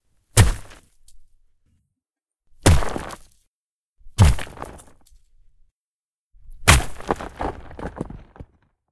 Rock Smash
The sound of a rock being smashed repeatedly.
Made for a short film:
breaking, broken, crumble, earth, hit, hitting, impact, pieces, shatter, smash, smashing, wall